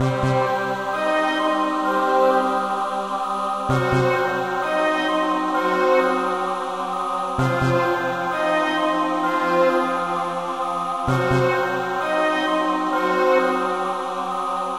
Mystery Loop #1
Mystery loop made in FL Studio.
2019.
movie, strings, mystery, soundtrack, church, choir, series, loop, game, sadness, sad, abandoned, synth, thriller, tragedy, cinematic, beat, orchestral, intro, film, dramatic, background, piano